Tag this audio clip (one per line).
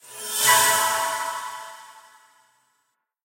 airy
breath
buff
fairy
fantasy
game
light
magic
magical
short
spell
spring
springing
stereo